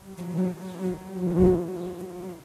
bee beat
short nearly rhythmic buzz of bee passing close by mic. London garden using minidisc.
field-recording, beat, garden, insect, buzz, bees